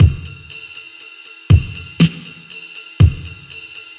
4 Beat 06 minimal
8 Beat drum loop
drum-loop, loop, Trip-hop, drums, Triphop, beat